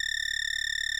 Was mucking around in Audacity and made a cricket chirp. I used the generate chirp option and distorted it. It would fit well in a night-time or summer scene.